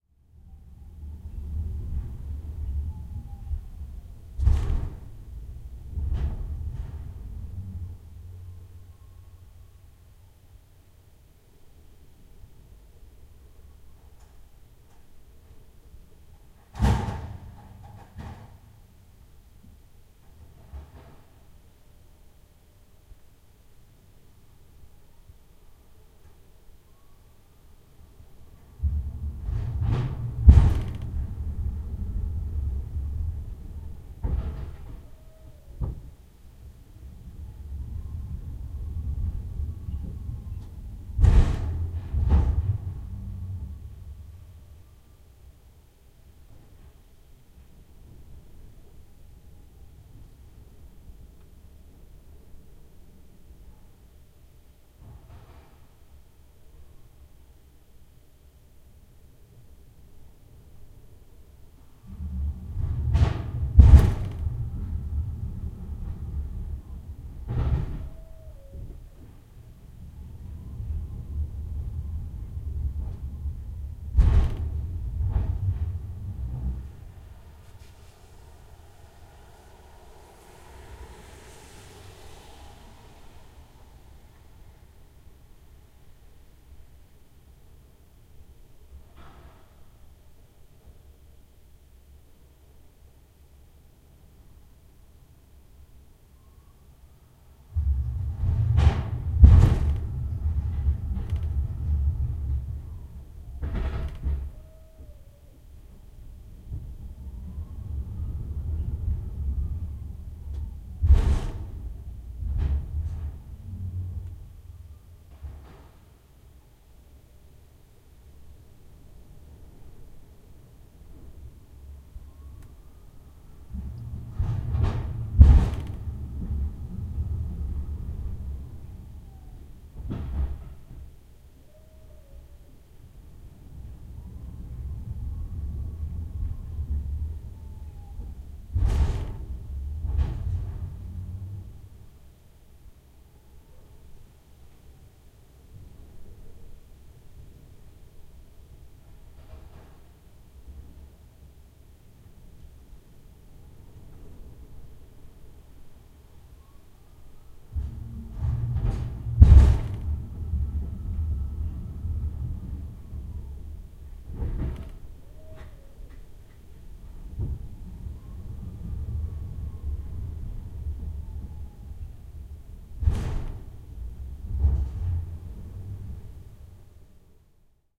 110809-unload in neuenkirchen
09.08.2011: tenth day of ethnographic project about truck drivers culture. Oure in Danemark. unload empty boxes. Sounds of forklift (rumbling, creaking).